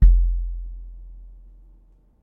Bang the water cooler bottle (19 L) 1 time.
water-cooler-bottle, plastic-bottle, office-cooler, office